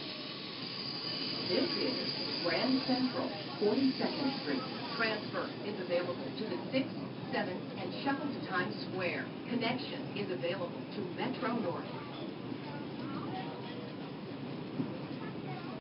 NYCsubway GrandCentralannouncement
NYC subway train announcement, arriving at Grand Central Station. Recorded with iPhone 4S internal mic.